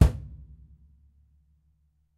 Self made 18x18" rope tuned kickdrum recorded with h4n as overhead and a homemade kick mic.